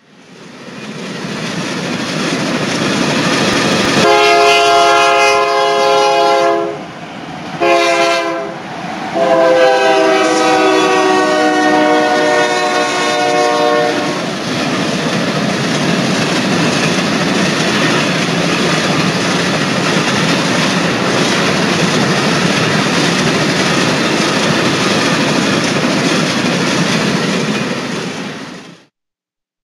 Train Approaching with Whistle Blaring and Wheels Rumbling on Tracks
This is a field recording of a fast approaching freight train coming and going with whitsle blaring. I continued to record the sound of the wheels rumbling on tracks - fades at end.
This is my edited version. I'll upload the raw recording and i'll name that file "Train upon us" Recorded in Buda, Texas on Main Street
wheels, field-recording, train, approaching, rumbling, whistle, tracks